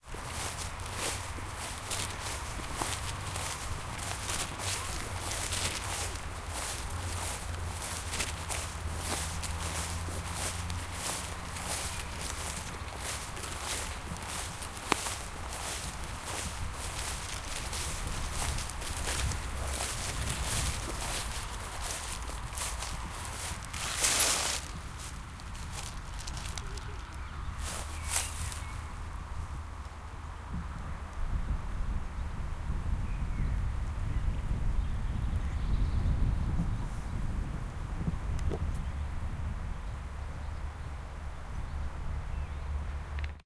walking through high grass short
walking with a plastic bag through high grass. on the right side is some traffic going.